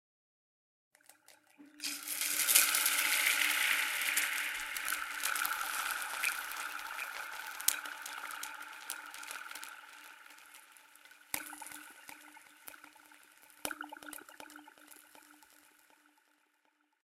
daily life sounds: sizzling
cook, eggs